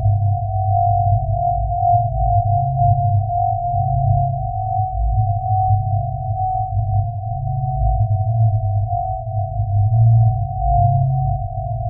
cloudcycle-cloudmammut.99
ambient, divine, drone, evolving, soundscape, space